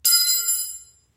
Dropped Spanner 1
Oops, dropped a spanner on the concrete floor of my garage.
harmonics, metal, spanner